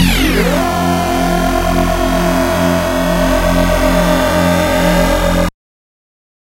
SemiQ leads 17.
This sound belongs to a mini pack sounds could be used for rave or nuerofunk genres
abstract, ambience, ambient, atmosphere, dark, deep, delay, drone, effect, electronic, experimental, fx, noise, pad, processed, sci-fi, sfx, sound, sound-design, sounddesign, sound-effect, soundeffect, soundscape